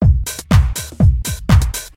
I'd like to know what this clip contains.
Hip Beat
Cool Hip Loop created by LMMS. If use plz send me link for song! Enjoy and plz check out some of my other sounds in my pack: Shortstack Loops!
Drums, Hip, Drum, bpm, Hi-hat, Open, Loop, Kick, Closed, Ride, FX, Snare, Bass, Beat